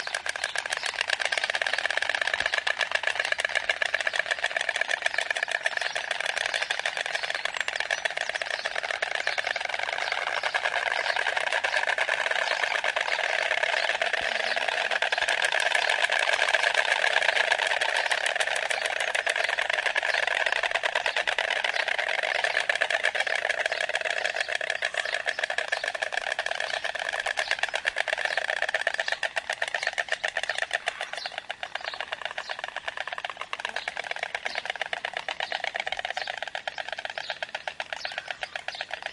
Recording in a bird refuge in southern France where loads of storks start clapping with their beaks high in the air and with their heads bent on the their backs. Also ambiance sounds and other birds.
beak, clapping, snapping, stork